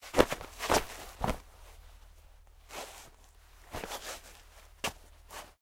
BODY FALL - STAGGER - GET UP
Body stumbles and falls to ground, then staggers and gets up.
body, fall, feet, footsteps, ground, scuff, stagger, stumble, trip